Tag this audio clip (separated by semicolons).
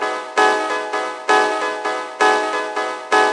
loop; techno